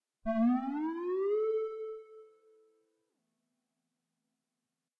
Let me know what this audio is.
A synth sound with a rising pitch.
digital, synth, pitch, rise